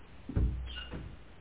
Stange Sound I Picked Up
As the title suggest, a weird noise that I registered, but I don't recognize. Not processed with noise reduction, registered using an Audiola SDA 8271N.